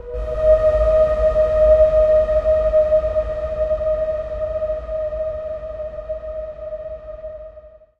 SteamPipe 5 SteamPad E4
This sample is part of the "SteamPipe Multisample 5 SteamPad" sample
pack. It is a multisample to import into your favourite samples. A
beautiful ambient pad sound, suitable for ambient music. In the sample
pack there are 16 samples evenly spread across 5 octaves (C1 till C6).
The note in the sample name (C, E or G#) does indicate the pitch of the
sound. The sound was created with the SteamPipe V3 ensemble from the
user library of Reaktor. After that normalising and fades were applied within Cubase SX & Wavelab.
ambient, multisample, pad, reaktor